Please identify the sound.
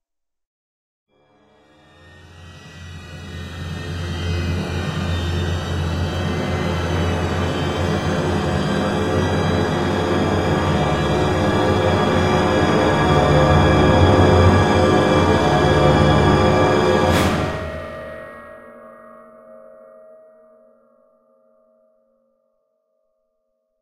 Robo Walk 05E
A giant robot taking a single step described using various instruments in a crescendo fashion.
Orchestral, Suspense